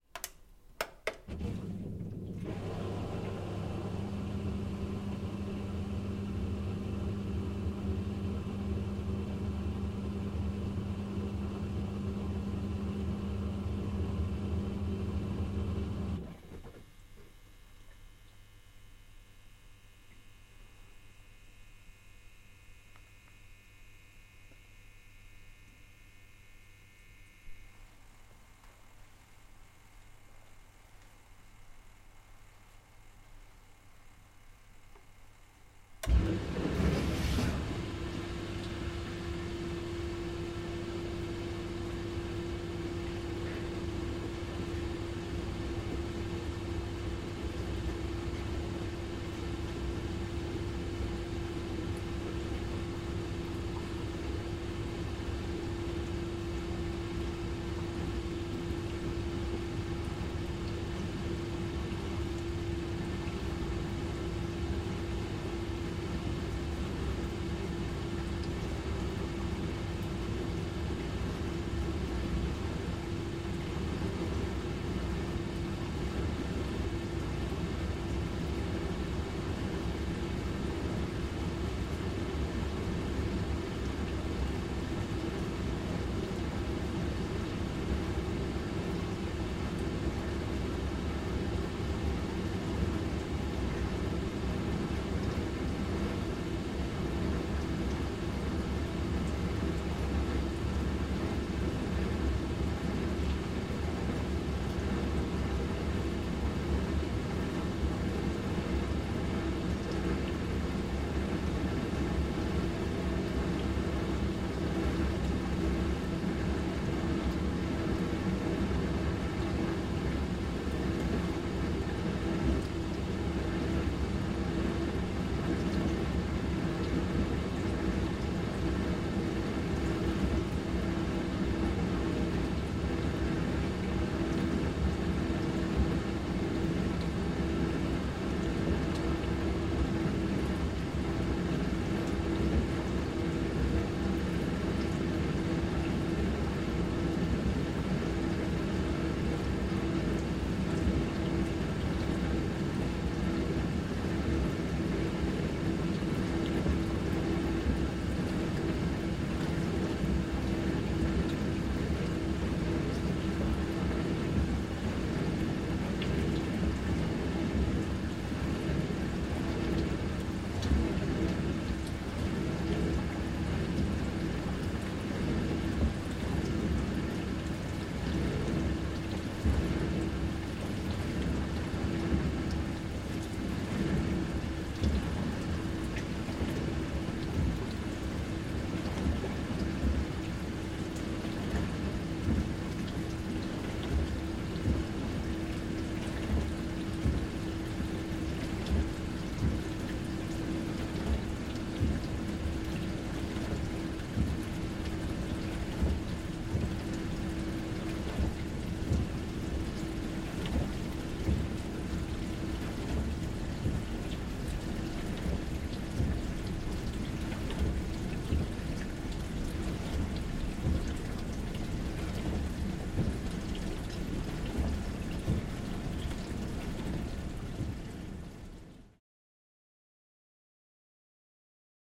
A short extract of our dishwasher at work.
Recorded with Zoom H6 recorder and Rode NTG-2 Shotgun Microphone. Cleaned and edited with Reaper.
cleaning, dishwasher, machine, wash, washer, washing, water